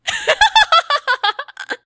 more laughing
Do you have a request?